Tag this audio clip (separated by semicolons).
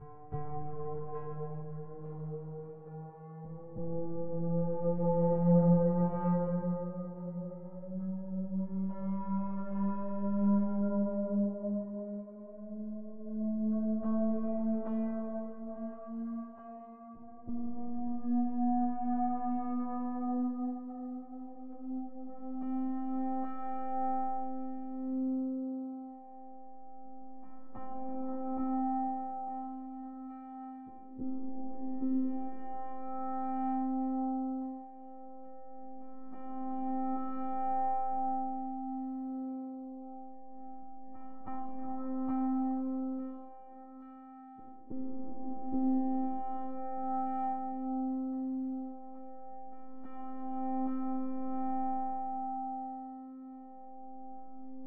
haloween; creepy; backround; nozie; music